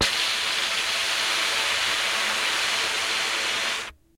Air blown through a trumpet
machine, noise, hiss, trumpet, experimental